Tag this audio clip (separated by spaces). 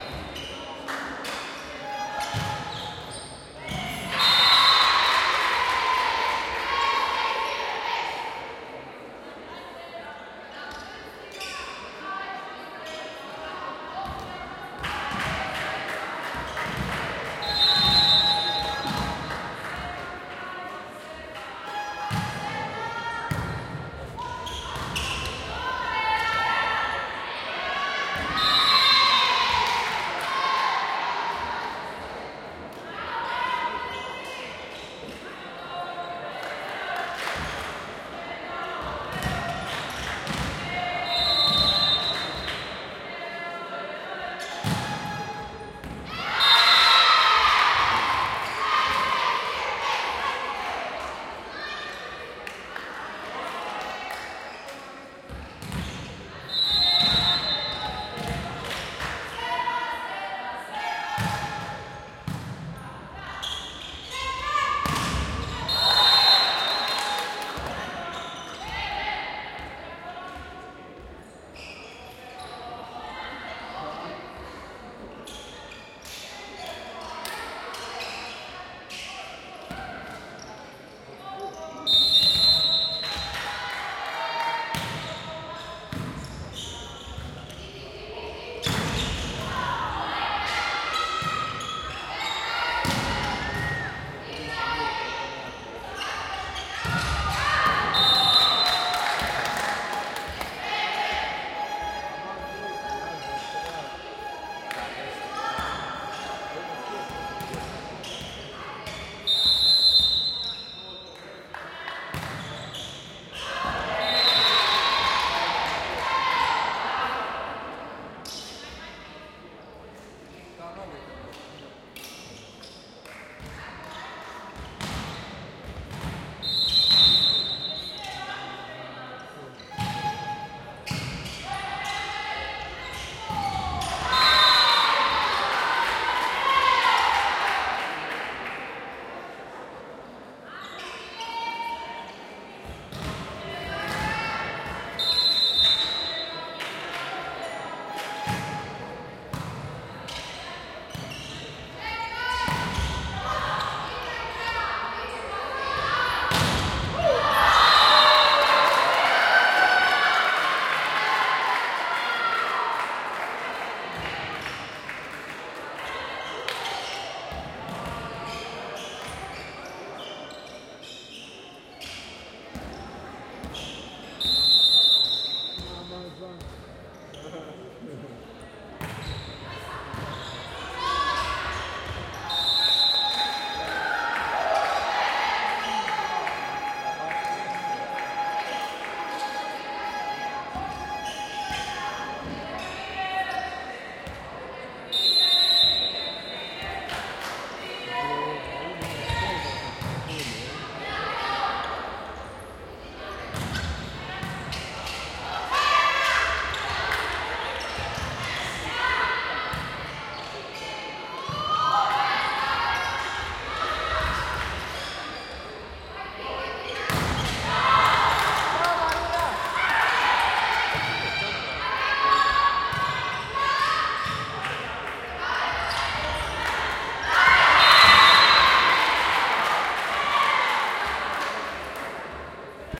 Winding Fans Handball Net Support Match Crowd Contest Odbojka Derbi Volleyball atmosphere Noise Ball Playing Sports ambience Sport Cheerleading